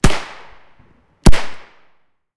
Simple barrel gun
Two shots fired with a barrel gun by my own hands, the second shot is a bit distorted.
I think I recorded this with a H2 zoom around 2012, it's not very clean but usable.
Use my files wherever you want and however you want, commercial or not. However, if you want to mention me in your creations, don't hesitate. I will be very happy ! I would also be delighted to hear what you did with my recordings. Thanks !